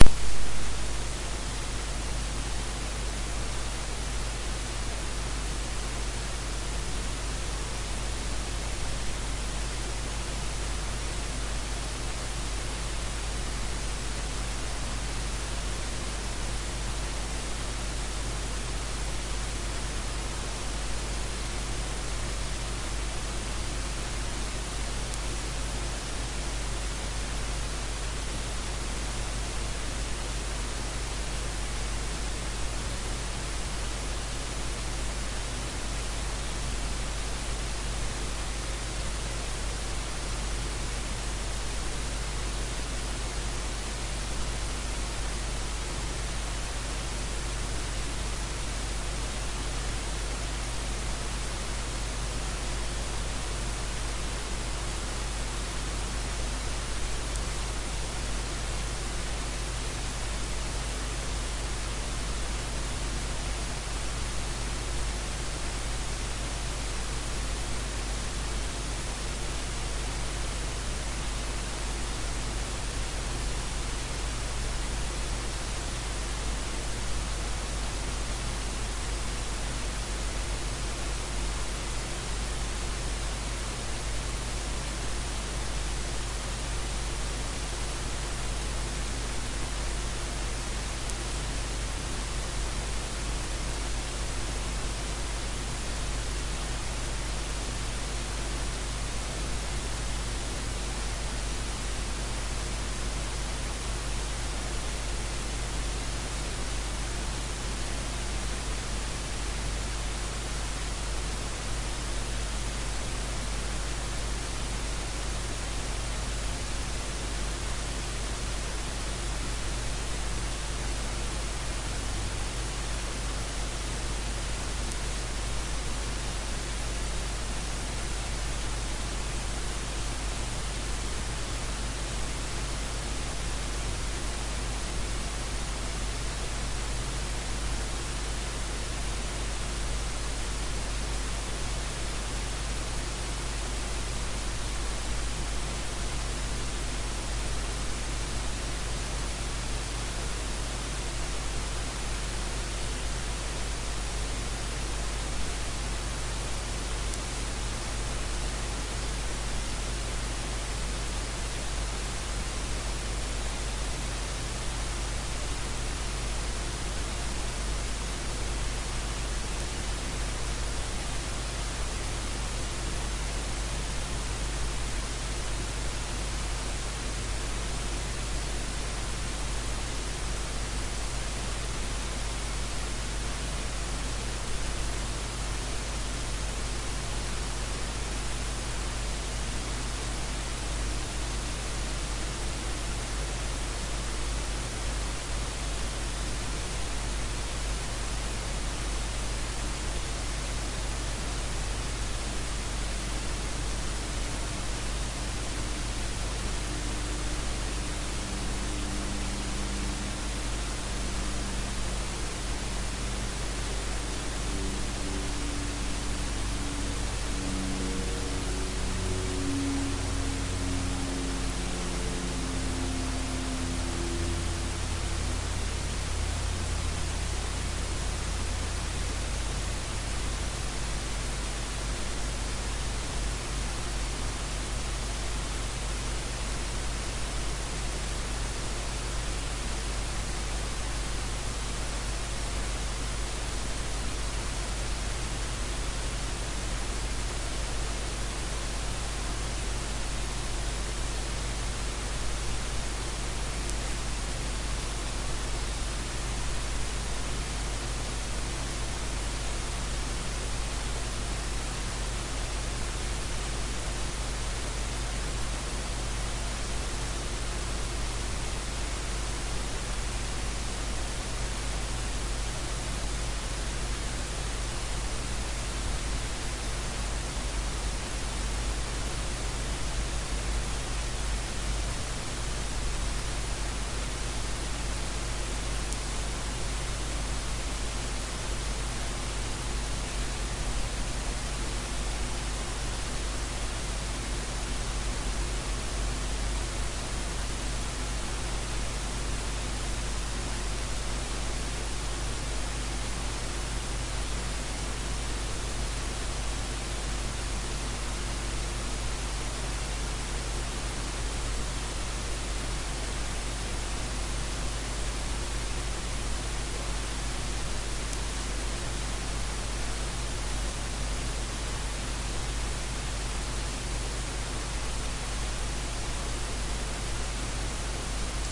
ECU-(A-XX)18+
Trajectory Calculator Electric Accelerator
Calculator, Trajectory